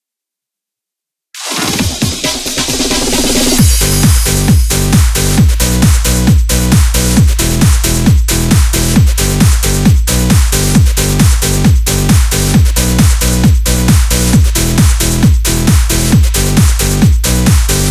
Over world intro
A full loop with video game sounding synths with modern sounding dance music. Perfect for happier exciting games. THANKS!
8bit, happyhardcore, mario, music, palumbo, sega, synth1, tim, trance, videogame, v-station